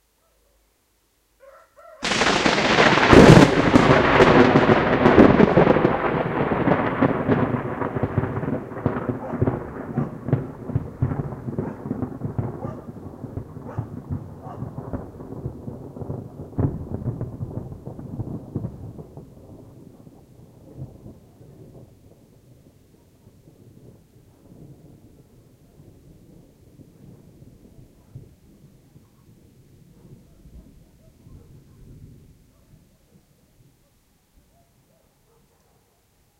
Very close lightning strike recorded by SONY ICD-UX512 stereo dictaphone Pécel, Hungary. 7th July 2018 morning.